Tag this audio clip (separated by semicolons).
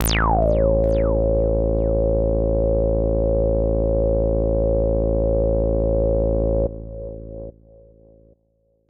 low; bass; acid